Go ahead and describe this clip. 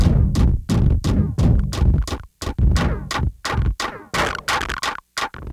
MR MorningTechno 06
Crunchy lofi overdriven analog drum loop, created with old Univox drum machine and FX.,
Crunchy, Jump-Up, Odd, Grime, Distorted, Analog, Lofi